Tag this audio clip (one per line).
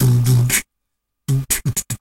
120-bpm
bassdrum
boom
boomy
Dare-19
kick
loop
noise-gate